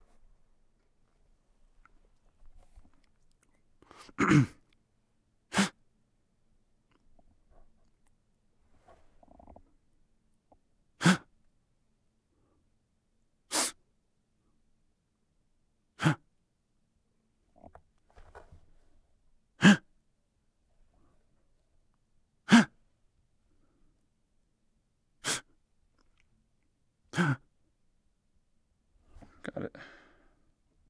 Gasps Male Quick
Various quick male gasps. Recorded with a Sennheiser ME-66 into a Sound Devices MixPre-D into a Tascam DR-40.
Male, Gasps, Gasp, Quick-Gasp